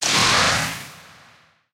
car game booster
boost, speed, booster, car, speed-up, digital